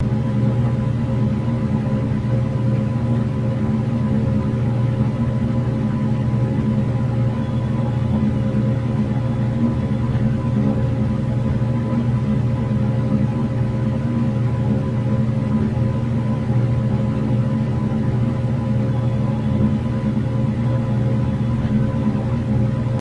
Atmospheric Spaceship 01
A futuristic sound for a spaceship, useful in a science fiction / space scenarios. This is the normal version, without stereoed phase effect.
normal, scifi, futuristic, spaceship, atmospheric, sci-fi